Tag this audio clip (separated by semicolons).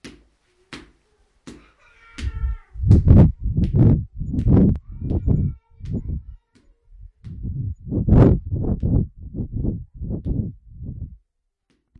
rennes; france; lapoterie; sonicsnaps